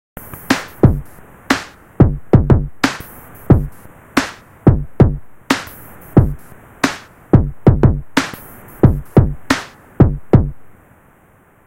Hip hop drum loop made using Reaper DAW and Cerebrum Vsti.